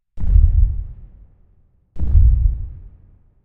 Heavy Dinosaur Footsteps Jurassic Park Water
Large, heavy footsteps like that of a dinosaur or other large creature.
dinosaur, effect, footsteps, Heavy, Jurassic, park, Raptor, Rex, ripple, t-rex, Tyrannosaurus, Velociraptor, water, world